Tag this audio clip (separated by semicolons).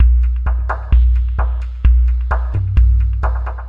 130; beat; bpm; dance; drum-loop; electro; electronic; loop; mic-noise; techno